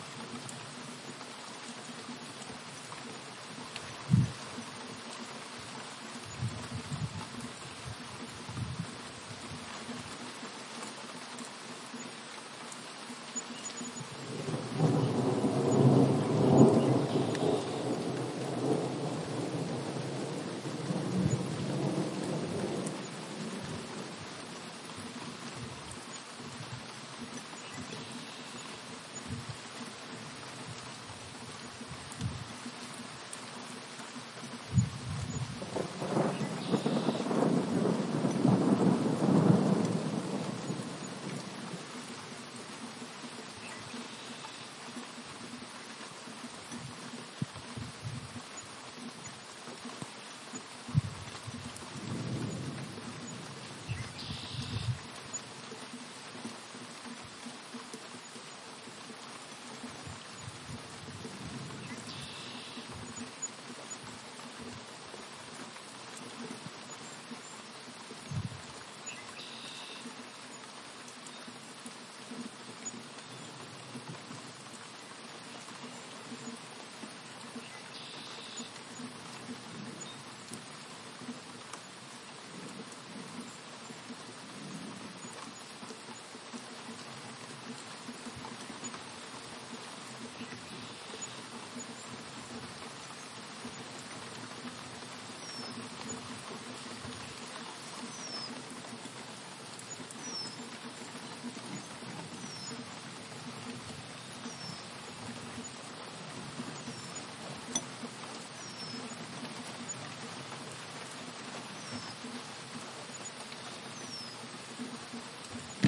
Thunderstorm in May, 2022
A nice storm in upstate New York (Danby). Some birds can be heard chipping in the background. Along with some unfortunate wind. No cars or human sounds can be heard.
storm, thunder, birds, rain, spring, birdsong, weather, thunderstorm, nature, raindrops, field-recording